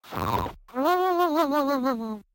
FrankenFurby Snoring
Samples from a FreakenFurby, a circuit-bent Furby toy by Dave Barnes.